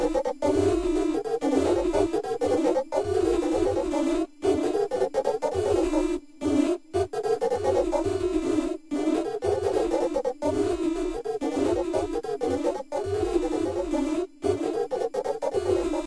crazy wabble
sine wave put through echo, reverb, delay and a few other bits and pieces
sine, wabble